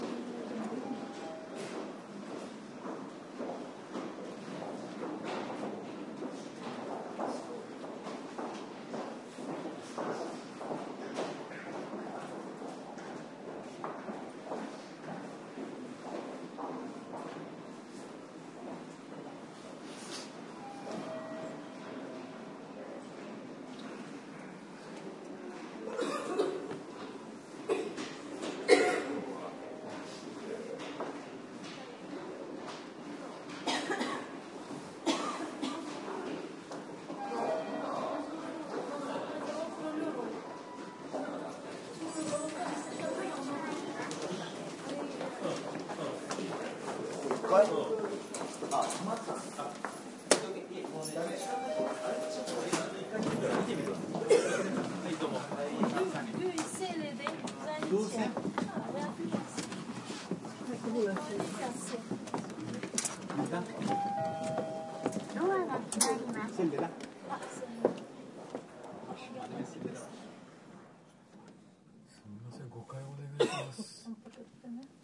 getting in the elevator
Getting into an elevator and doors close in Tokyo.
tokyo, elevator, japan, background